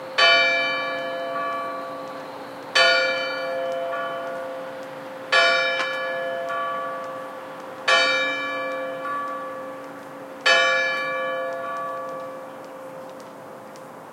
Church Clock Strikes 5
The church bell strikes 5 oclock